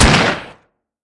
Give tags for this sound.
Pistol
Handgun
Gunshot

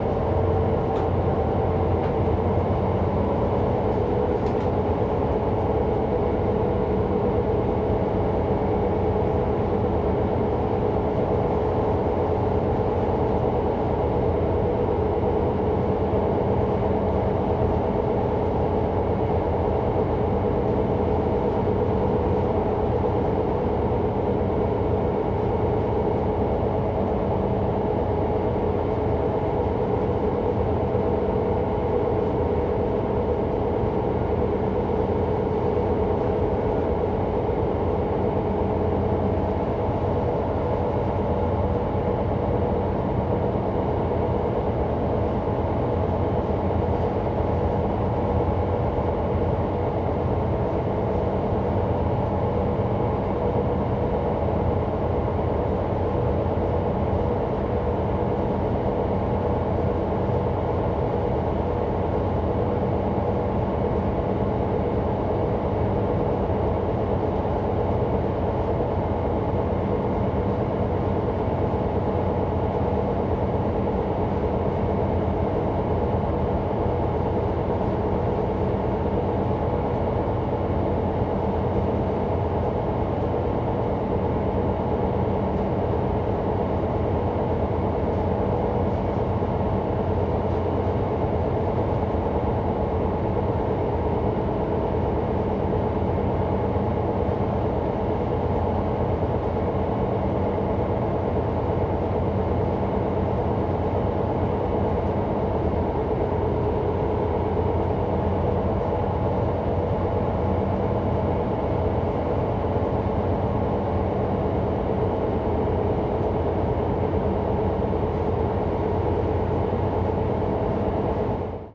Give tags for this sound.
ocean
sea
control
boat
ship

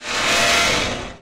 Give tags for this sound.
grinding
mechanical
metal
resonating
resonator
scrape
scraping
scratching

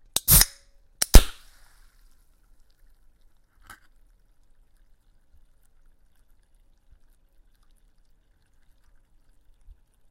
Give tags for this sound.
beverage
bottle
can
carbonated
coke
cola
crack
cracking
drink
drinking
fizz
fizzy
fresh
liquid
open
opening
pop
sipping
sizzle
soda
sparkling

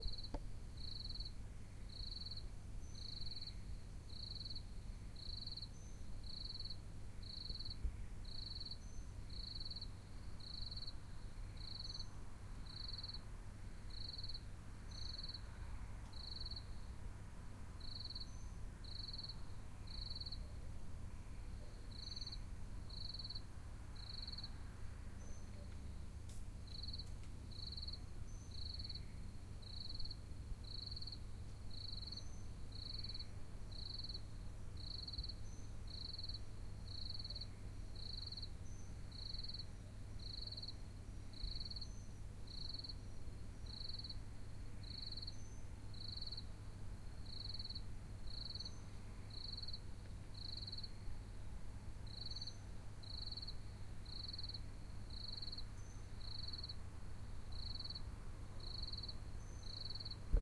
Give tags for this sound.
OWI
nature
crickets
night

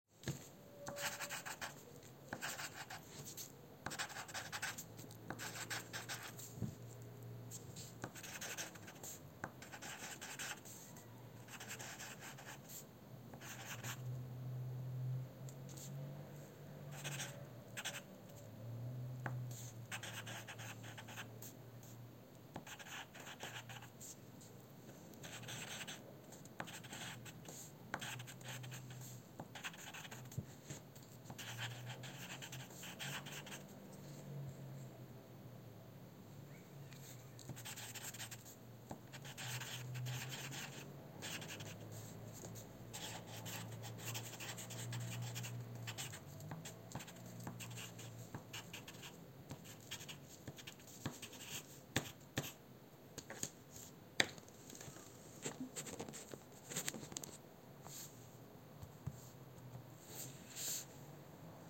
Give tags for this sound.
matita
paper
pencil
writing

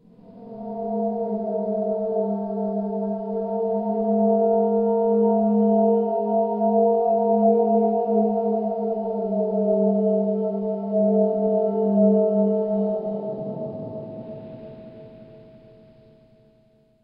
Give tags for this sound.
howl
remix
transformation

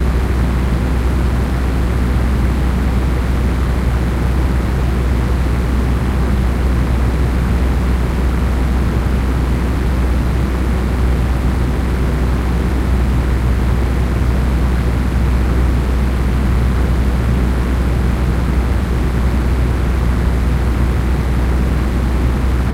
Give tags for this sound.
Fighter
flying
Propeller
Warbird
Plane
Jet
Flight
Aircraft

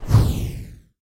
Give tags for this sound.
burst-of-fire
lancing-fire
lava
jet-of-flame
fire-plume
flame-jet
fireball
fire-blast
burst-of-flame
arcing-fire
ball-of-fire
fire-burst
fire-attack
flamethrower
plume-of-flame
flame-burst
flame-plume
blast-of-fire
fire
plume-of-fire
approaching-fireball
flame-thrower

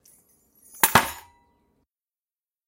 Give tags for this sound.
drums
1-shot
drum

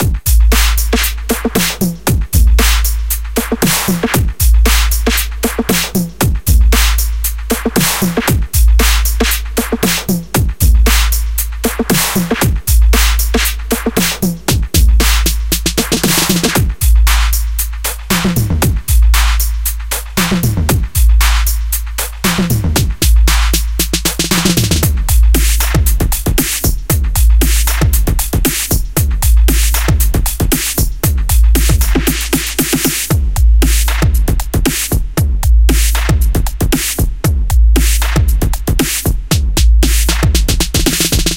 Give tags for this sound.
dub
looper
116
116bpm
ujam
dance
loop
break
bpm
kick
beats

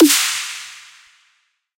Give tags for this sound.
drum
dubstep

